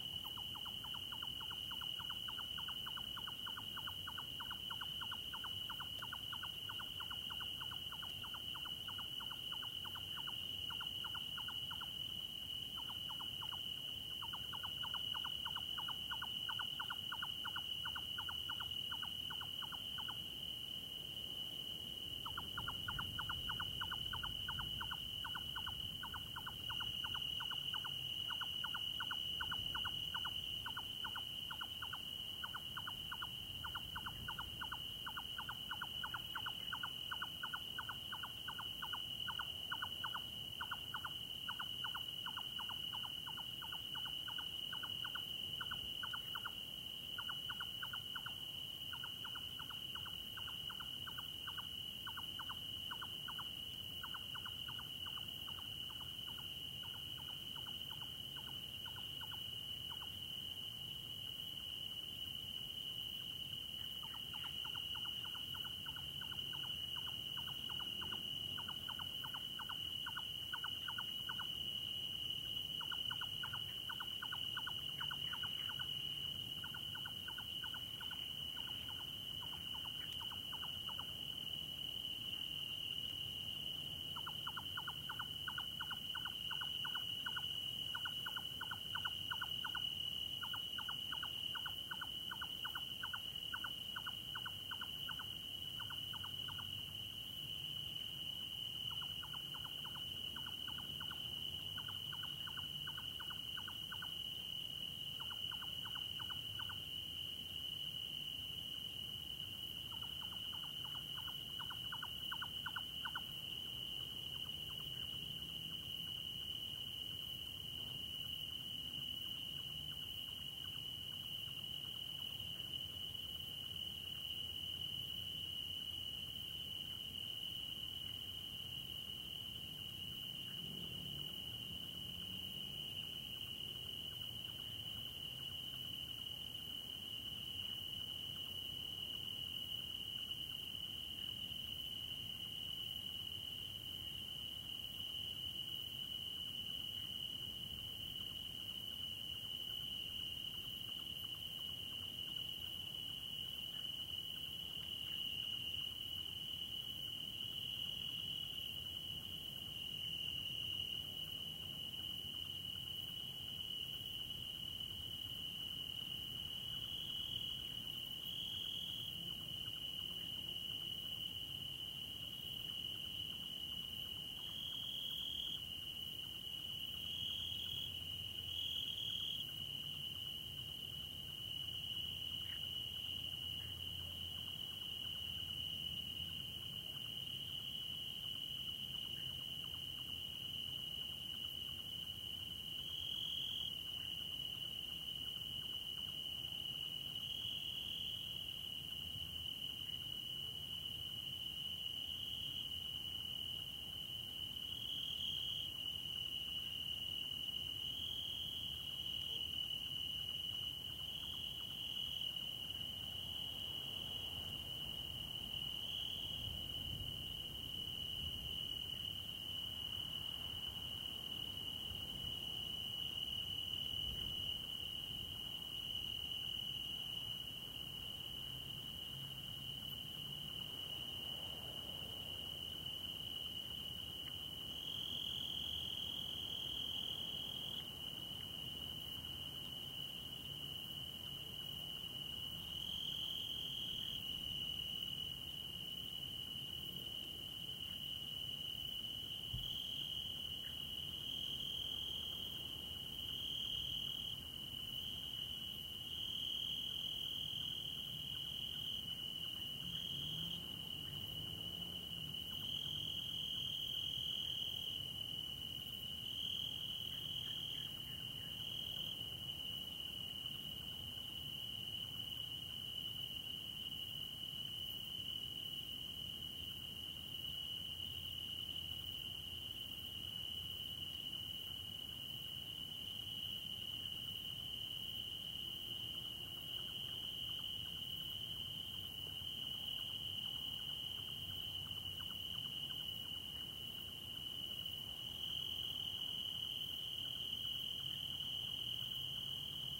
21062007.night.late
Early night ambiance in scrub near Donana National Park, S Spain, including crickets, Nightjar calls, soft frog calls, some distant vehicles and mosquitoes, as well as the rumble of waves on the distant beach. Decoded to mid-side stereo with free VST Voxengo plugin, unedited otherwise.
nature, field-recording, birds, south-spain, summer, ambiance